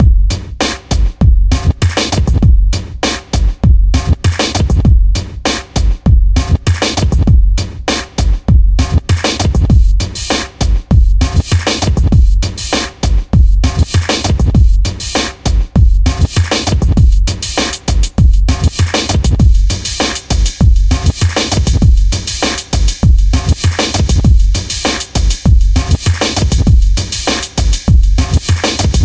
diztheeng 99bpm
99 bpm beat, kind of a breakbeat or some faster trip-hop, interesting and quite weird, programmed by me around 2001.
99bpm, breakbeat, loop, processed, strange, trip, weird